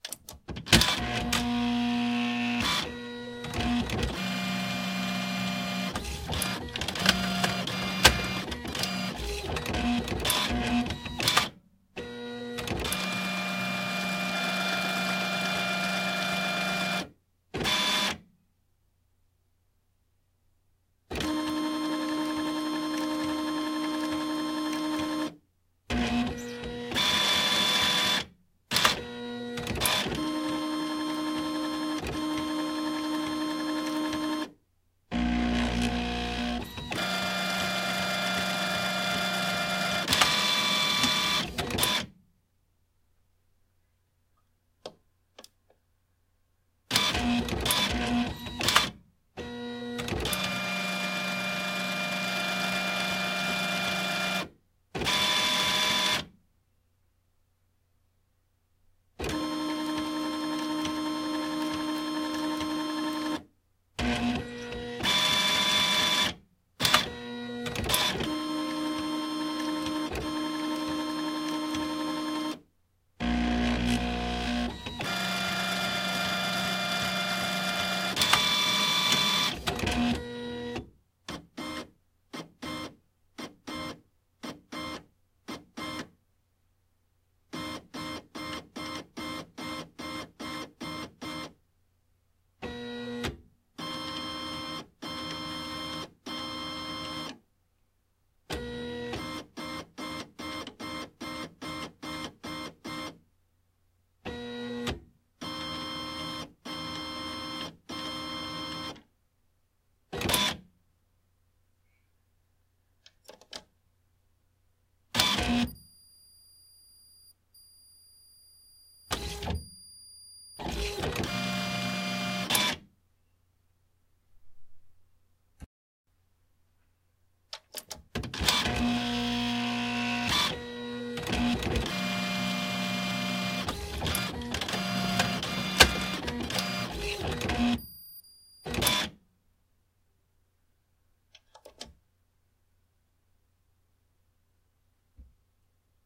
Color 440 sounds NR norm
Epson Stylus Color 440 printer powered on for the first time after nearly 3 years in storage.
I found the original recording from 2013 to be lacking in quality, so I decided to record a new one.
Recorded with Samson Go Mic
buzz, mechanical, motor, hum, machine, booting-up, operation, printer, power, machinery